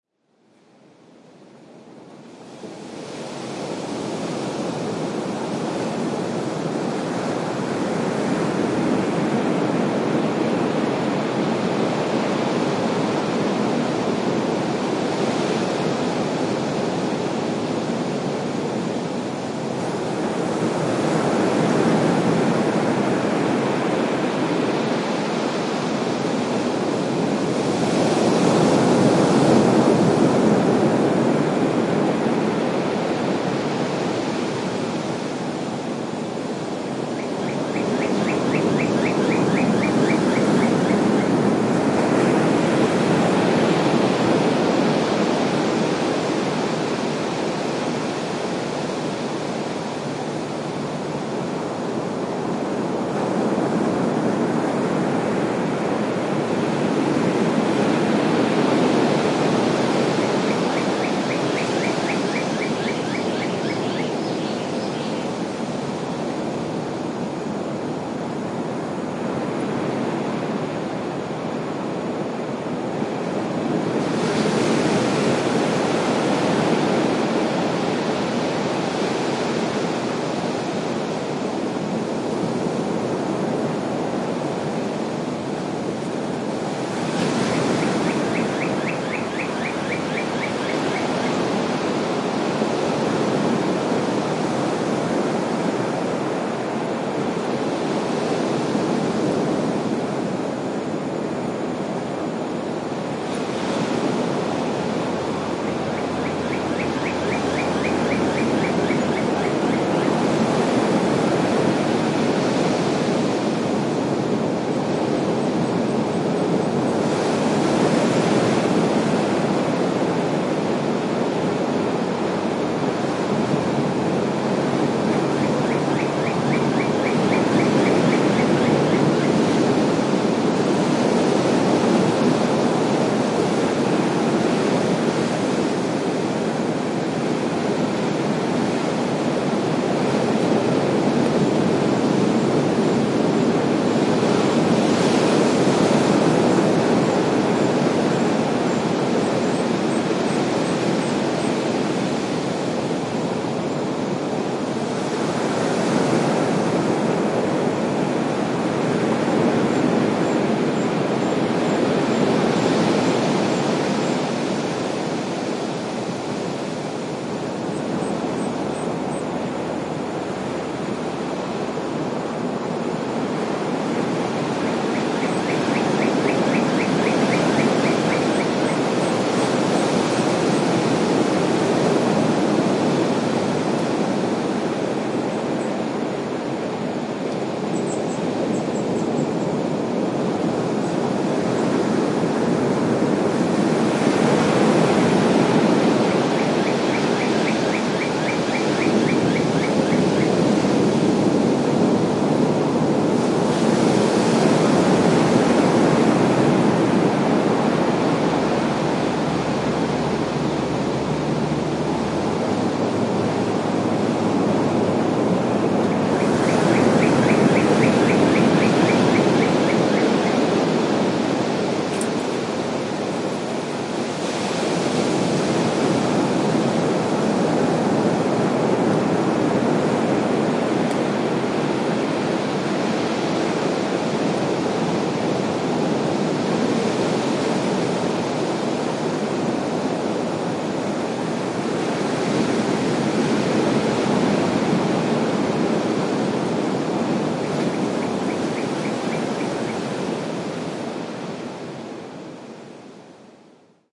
Beach meets jungle at Marino Ballena National Park, Costa Rica